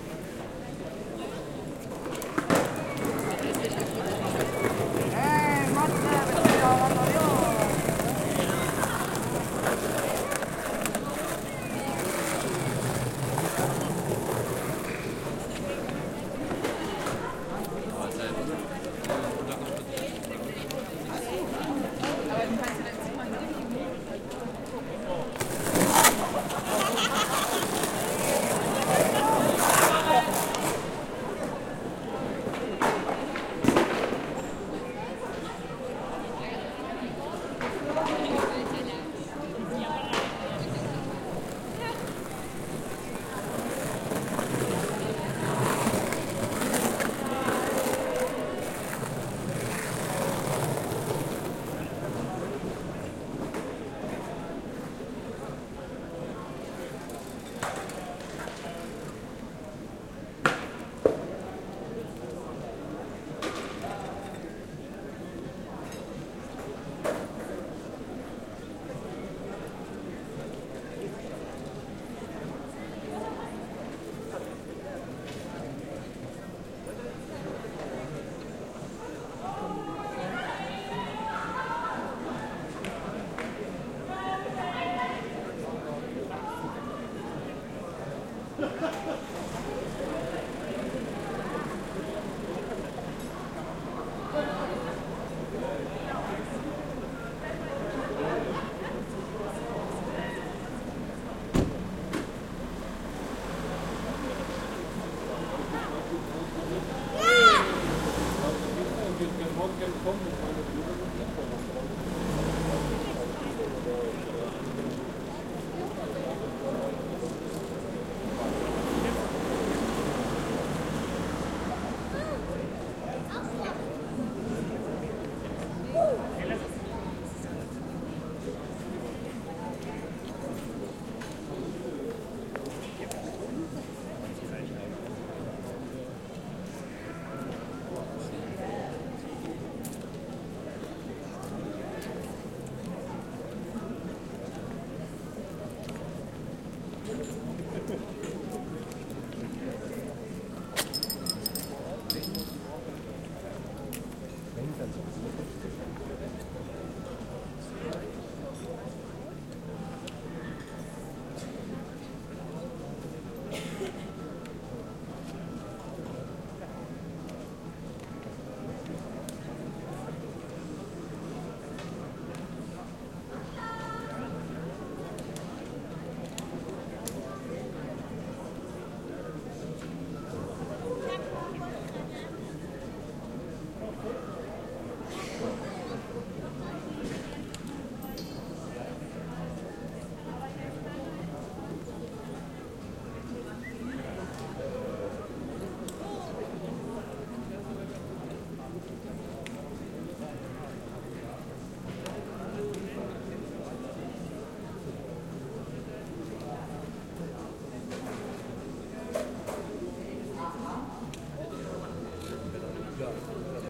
Weimar Theaterplatz
ambience, city, field-recording, people, public-place, skateboards